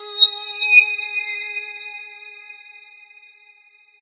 THE REAL VIRUS 10 - RESONANCE - G#4
High resonances with some nice extra frequencies appearing in the higher registers. All done on my Virus TI. Sequencing done within Cubase 5, audio editing within Wavelab 6.
lead, resonance, multisample